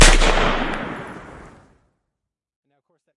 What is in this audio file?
A real pistol sound but it has so much character, great for movies.I have added a slight sub base to this. It has a crackle after bang and a shell can be heard very quietly.